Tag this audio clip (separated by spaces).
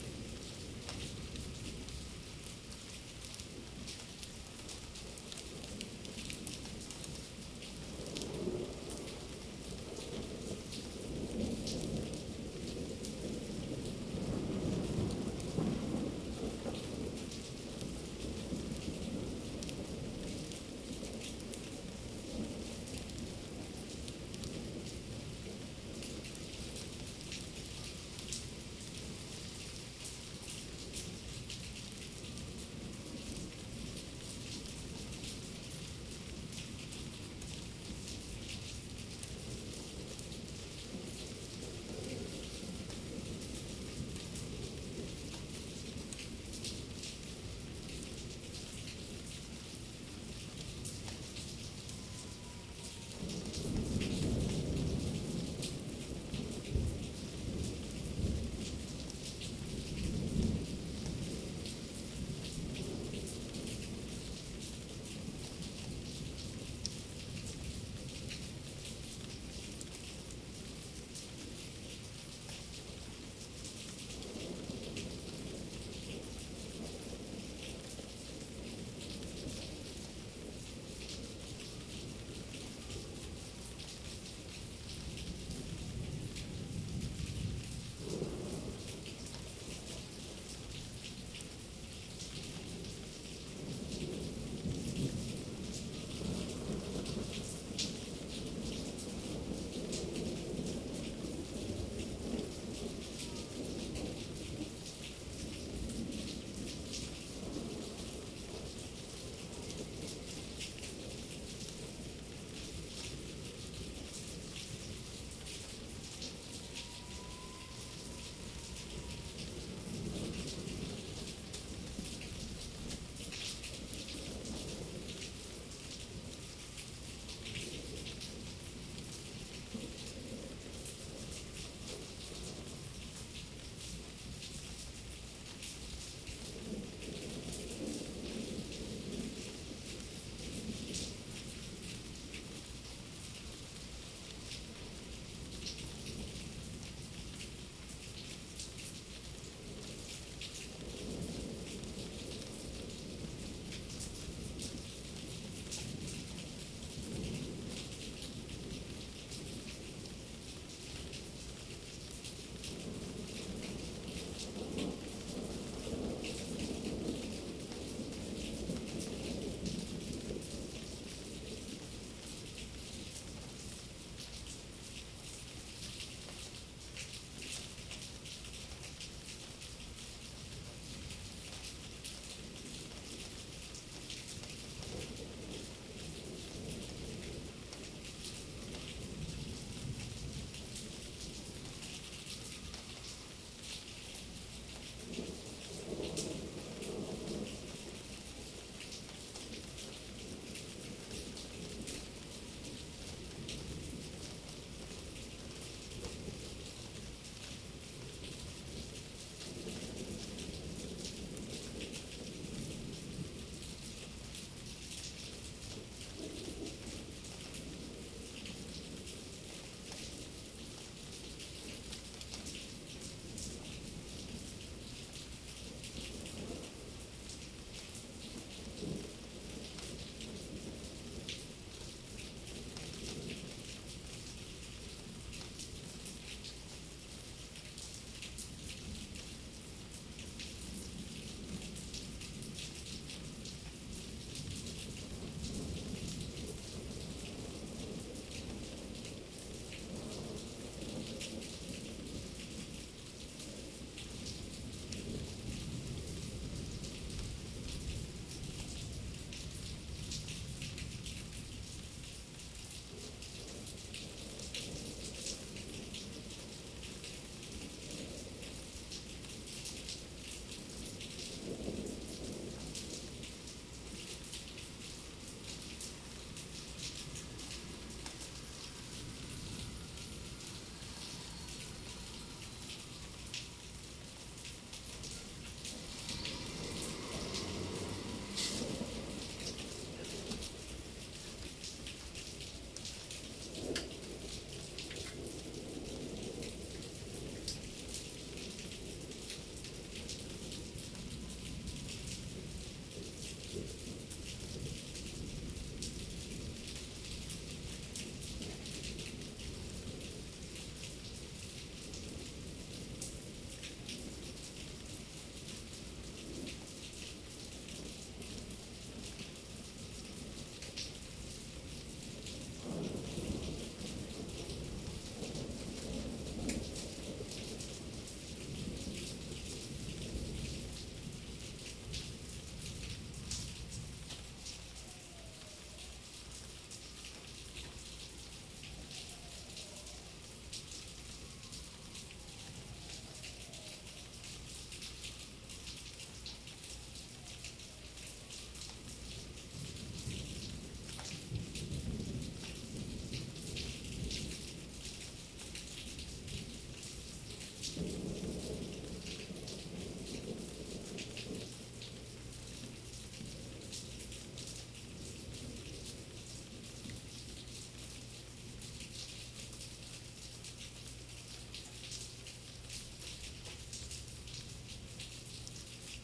weather rain thunder-storm field-recording